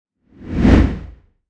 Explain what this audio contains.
Whoosh Heavy Spear Hammer Large
like heavy hammer or speark whoosh
medieval, large, heavy, spear, hammer, whoosh, weapon